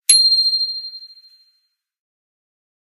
bicycle-bell 17
Just a sample pack of 3-4 different high-pitch bicycle bells being rung.